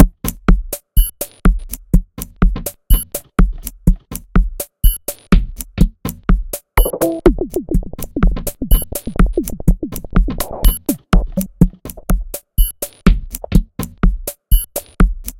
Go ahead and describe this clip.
disturbed nest
minimal techno loop, about an disturbed bird nest.
industrial, loops, machines, minimal, techno